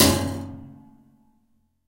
A plastic ruler stuck in piano strings recorded with Tascam DP008.
Une règle en plastique coincée dans les cordes graves du piano captée avec le flamboyant Tascam DP008.
piano, prepared, detuned